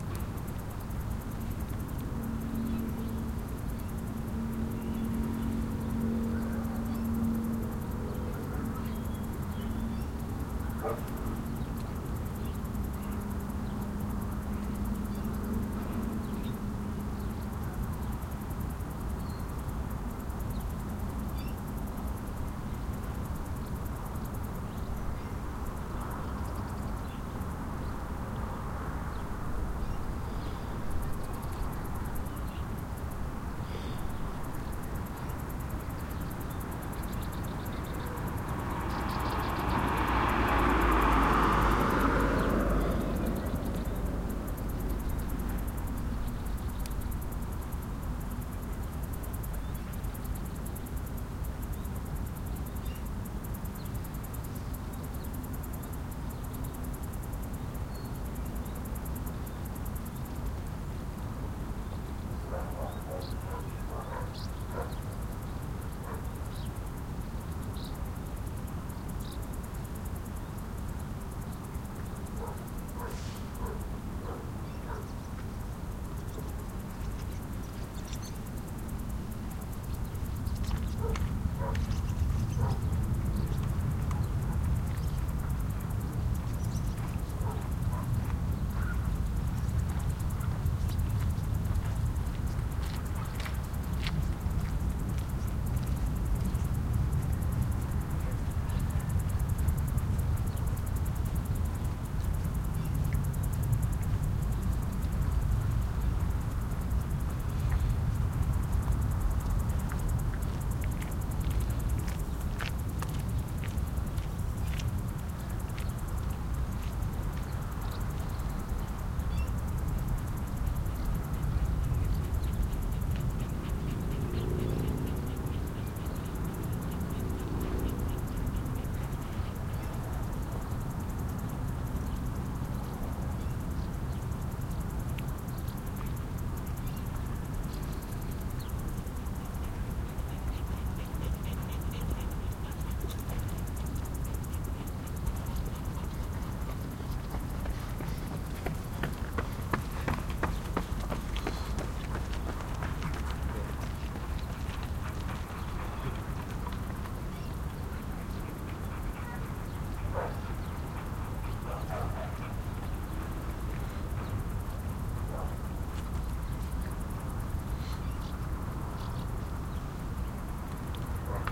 Ambience Mountain Outdoor Mirador Torrebaro
Mountain Ambience Recording at Mirador Torre Baro, August 2019. Using a Zoom H-1 Recorder.
Crickets, Mountain, MiradorTorreBaro, Wind